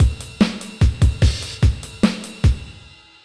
4 Beat 12 Triphop
4 Beat Drum loop for Triphop/Hiphop
beat, drum-loop, drums, loop, Trip-hop, Triphop